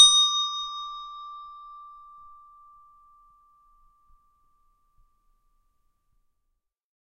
SMALL BELL - 3
Sound of a small bell, made for children. Sound recorded with a ZOOM H4N Pro.
Son d’une petite cloche pour enfant. Son enregistré avec un ZOOM H4N Pro.